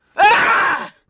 male scream
agony, cry, fear, pain, schrill, scream, shriek, torment